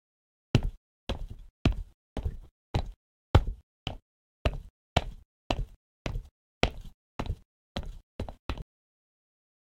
Footstep Stone
Footsteps recorded in a school studio for a class project.